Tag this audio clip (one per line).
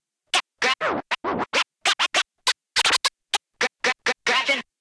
acid-sized
classic
dj
golden-era
hip-hop
rap
scratch